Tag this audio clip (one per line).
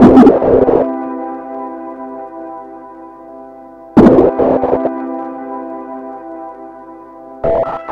field
sample
ambient